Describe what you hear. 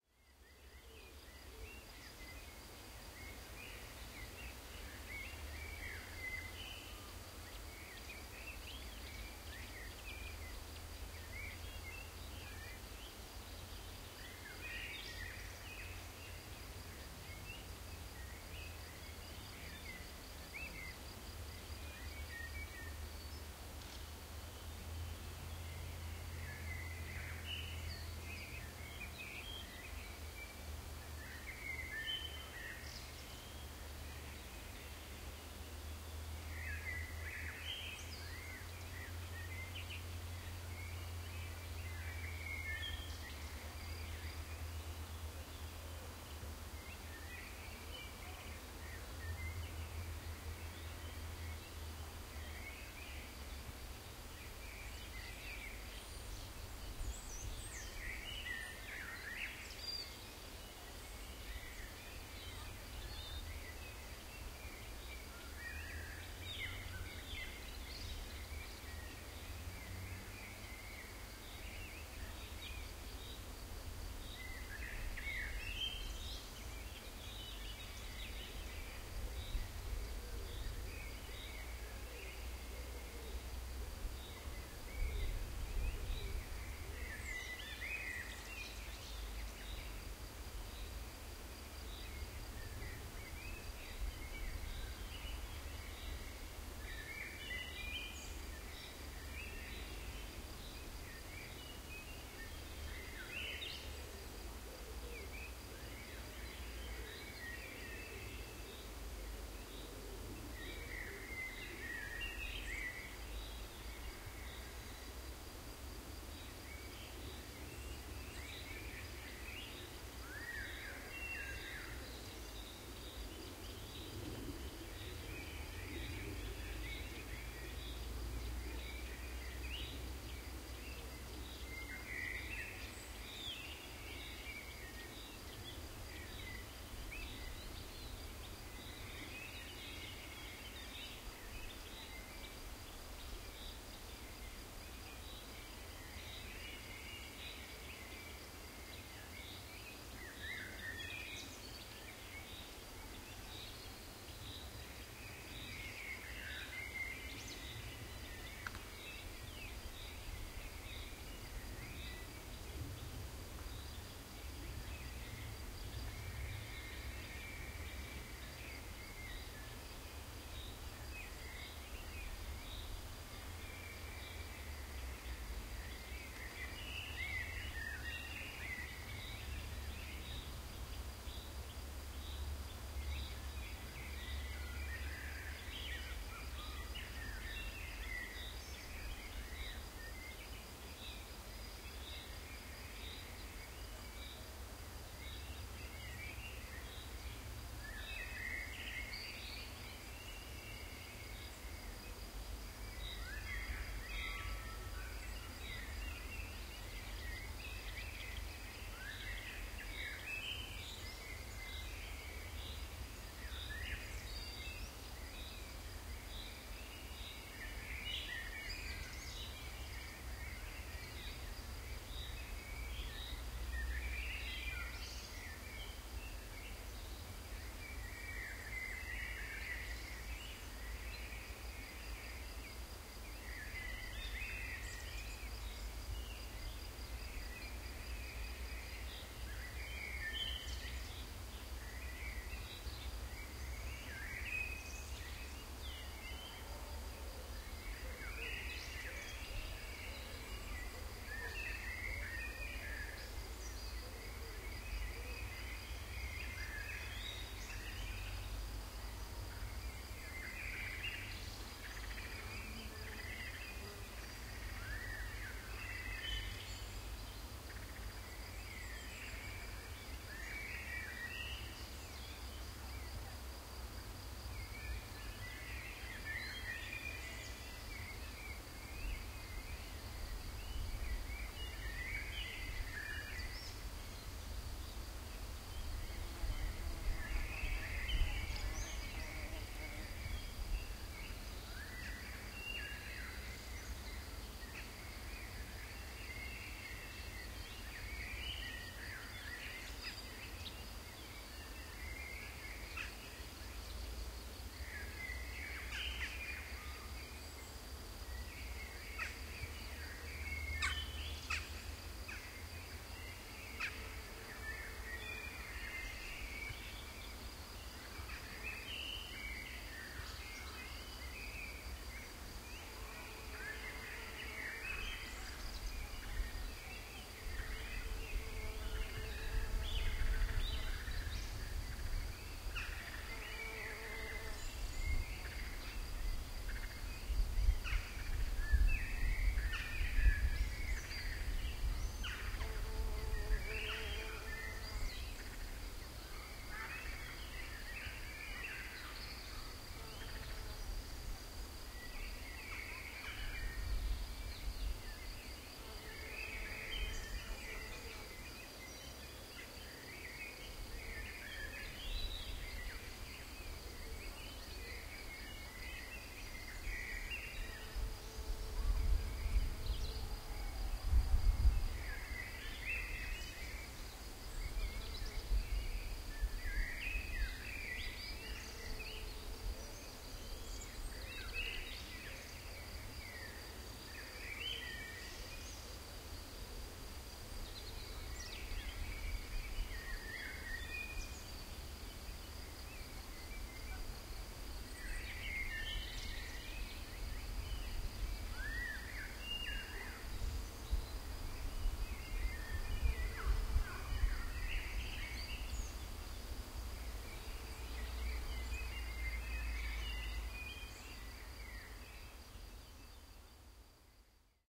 Wild Park Slightly Stormy Afternoon
May 27th 2018 at 3 P.M
This is a slightly stormy afternoon in the South of France, hot and humid. This park is big and very well preserved with a wide variety of bugs and birds.
I set the recorder on an open area. A very mild storm can be heard in the background.
Technical infos :
recorded with a Tascam DR-40 with two external microphones using a Mid/Side technique.
Mid Mic : Audio Technica AT4041
Side Mic : AKG P420 (fig 8)
MS processing in Audition with a small EQ correction.
Location : Park in Dieulefit (Drôme Provençale) : 44°31’26” N 5°3’12” E